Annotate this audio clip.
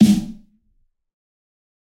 fat snare of god 023
This is a realistic snare I've made mixing various sounds. This time it sounds fatter
drum, fat, god, kit, realistic, snare